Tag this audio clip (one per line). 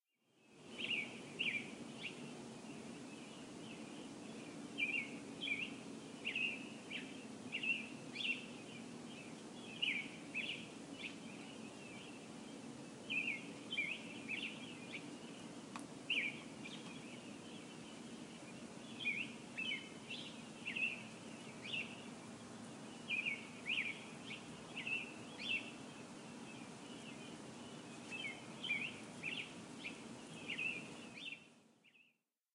bird
birds
birds-chirping
chirp
chirping
nature
robins
spring
tweets